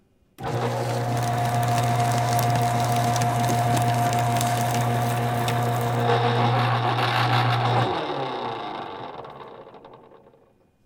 Home office paper shredder shredding personal mail.